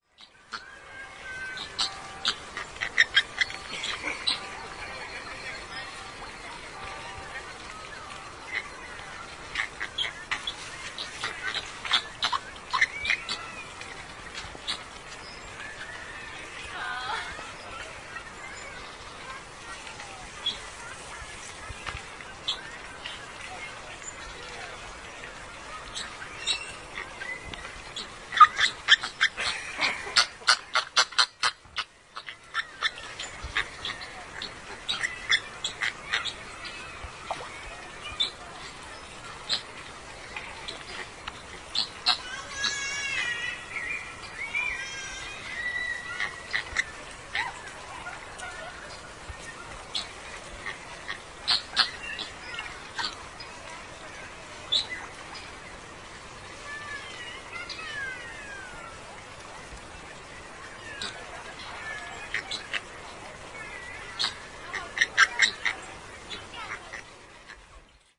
20.09.09: about 18.00, The New Zoo in Poznań. A colony of flamingos are eating. In the background the city noise and people's voices.